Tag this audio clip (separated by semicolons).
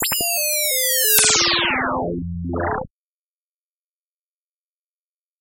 science; science-fiction; sci-fi